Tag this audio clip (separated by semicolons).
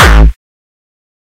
drum; synth